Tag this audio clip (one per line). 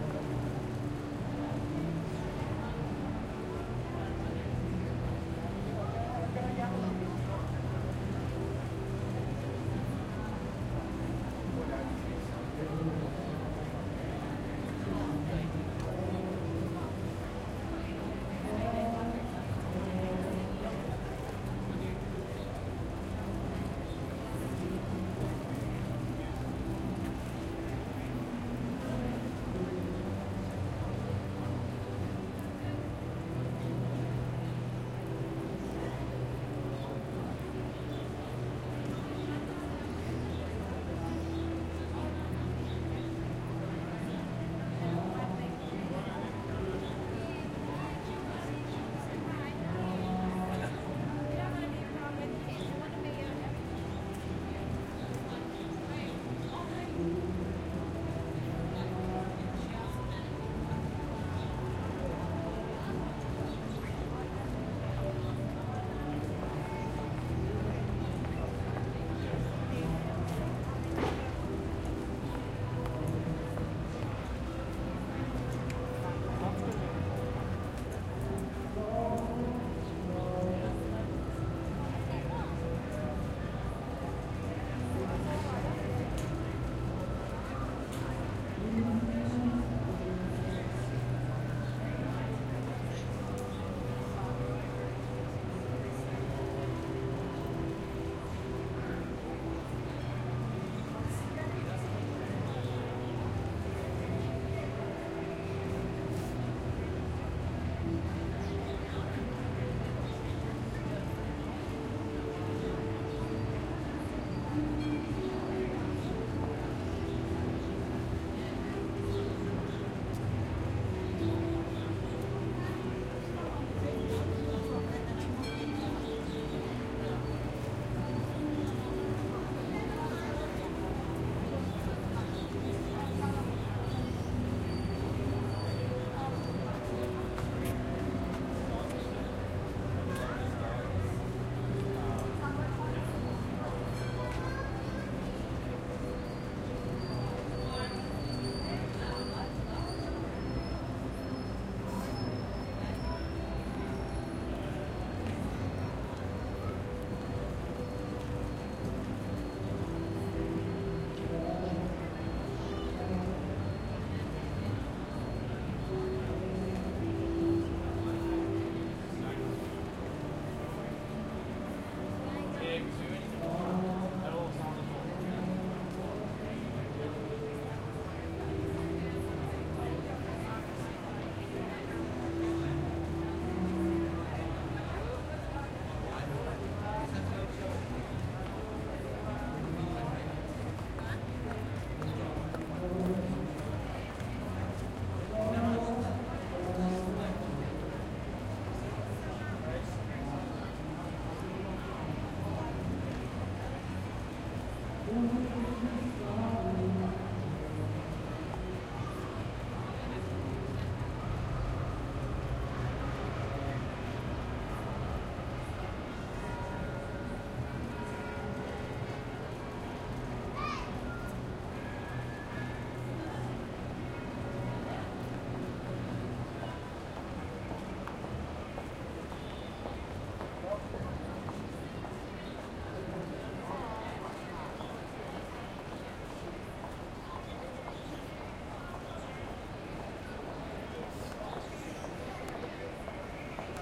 atmos
atmospheric
background-sound
city
general-noise
humans
melbourne
people
walking